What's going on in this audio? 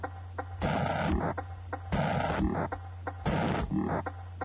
Off of my DD 20 I encountered this Random "Alieatron" effect it was kind of scary...
glitch, bent, circuit, techno, freaky